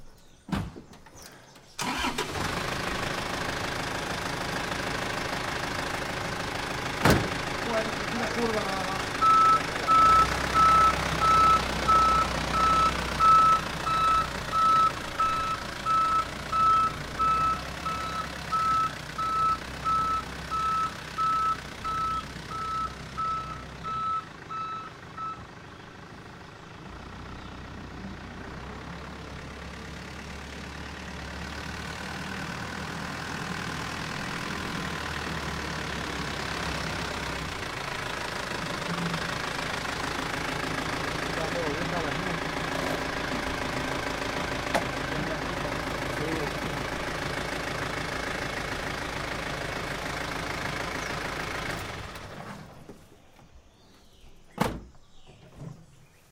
truck throaty backup slow with beeps and door open close slam real but with voices Saravena, Colombia 2016